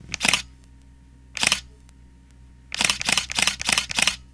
Camera Shutter
Sound of the shutter from my Canon T3i
dslr, nikon, picture, pictures, shoot, slr, snap